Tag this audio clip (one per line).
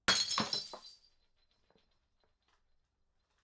Break
Foley
Mug
Smash